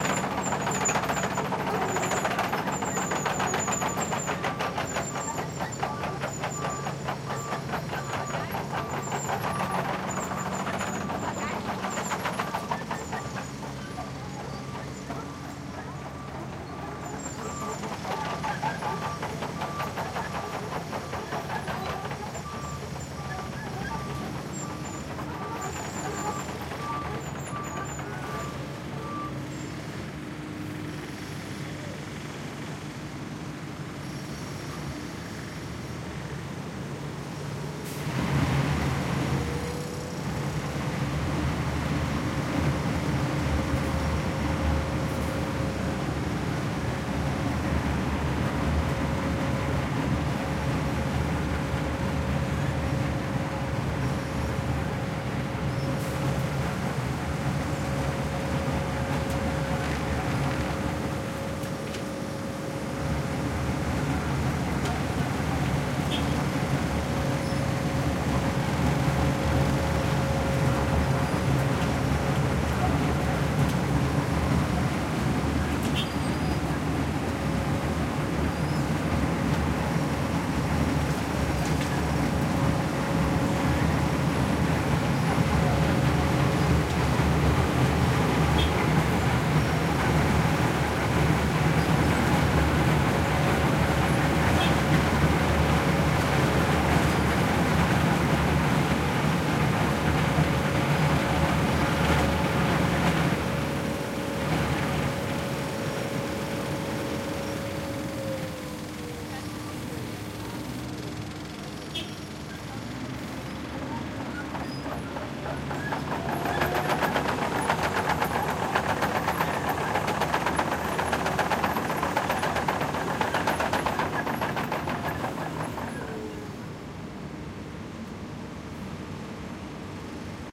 asphalt shredder working
Big shredder eating the asphalt an old road.
roadworks, street, field-recording, noise